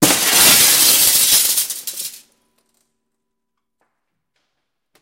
Windows being broken with vaitous objects. Also includes scratching.
breaking-glass, break, window